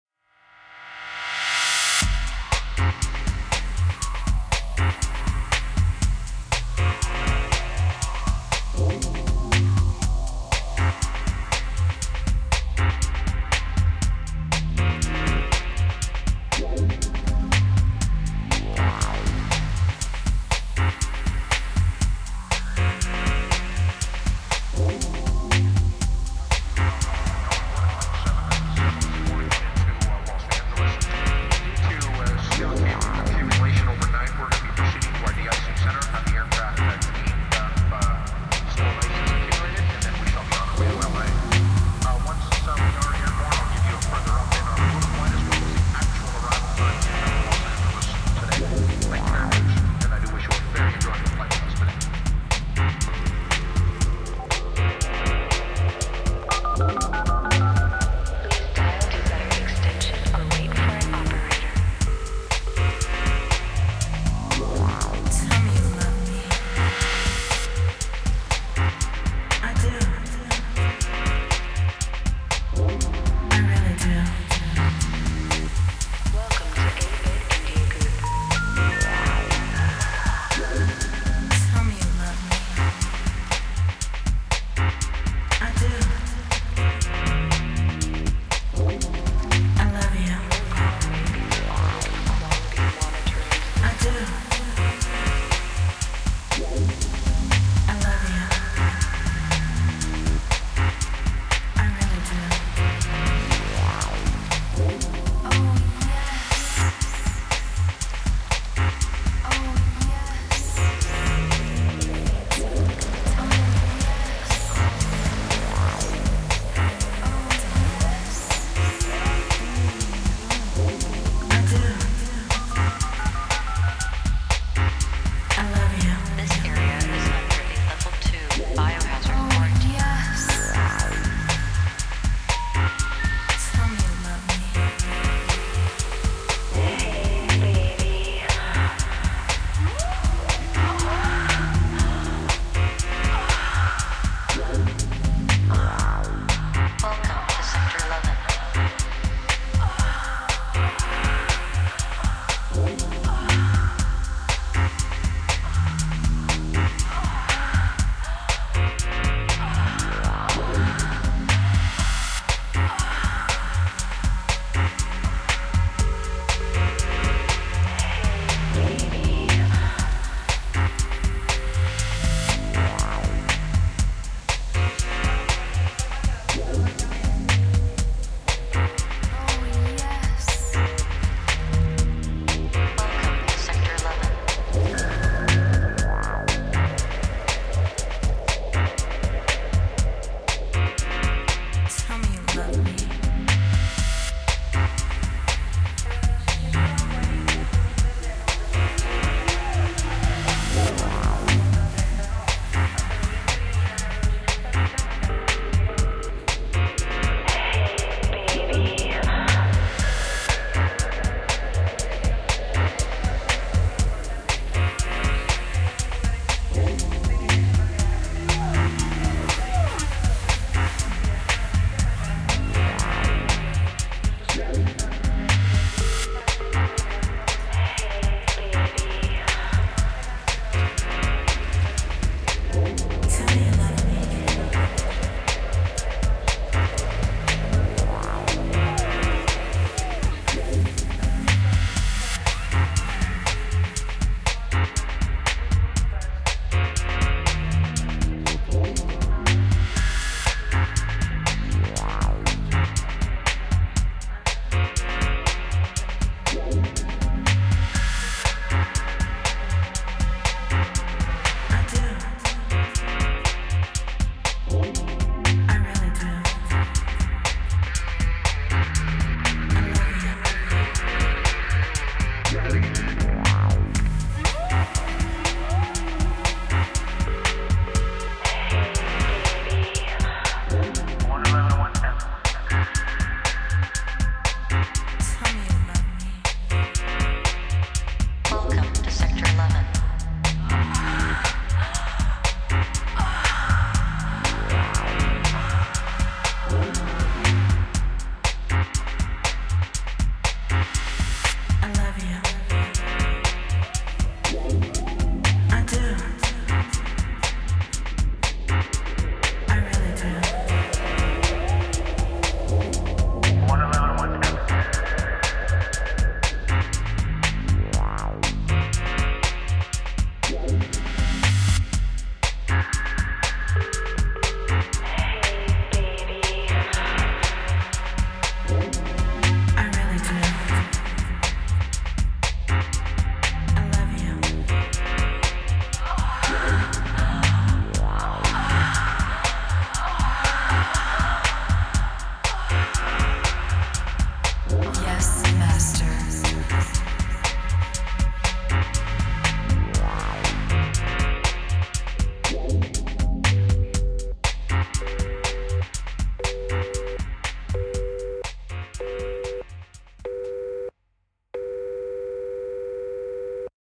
Welcome to sector 11
chillout, deep-into-perspectives, house, chill, downbeat, lounge